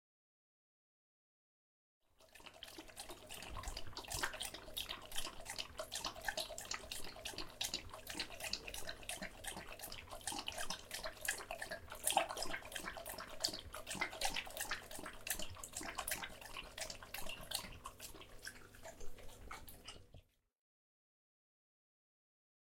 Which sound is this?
A dog drinking tap water out of a bowl